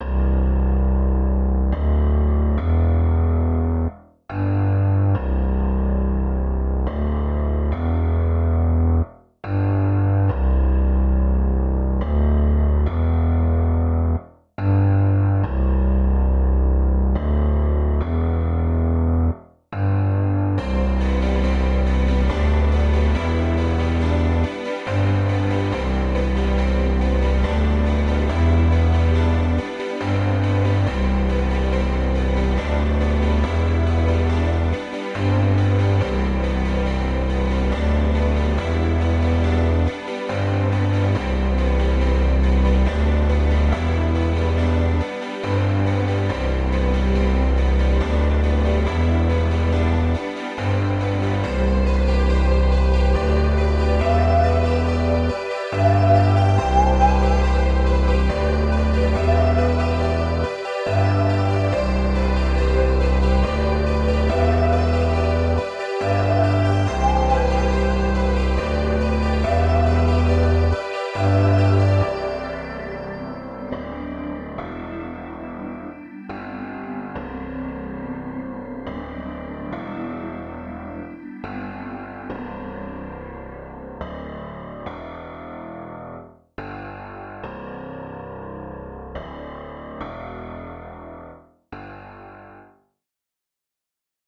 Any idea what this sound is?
Childhood Memories - 80's Synth Style
Instrumental in the style of 80's synth. Let me see what you can do with it.
melancholy, sad, 80s, melody